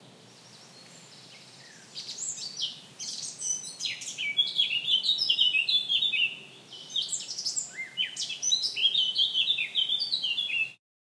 birds chirping in a forest
nature, bird, forest-birds, field-recording, bird-chirping, bird-chirp, birdsong, birds, forest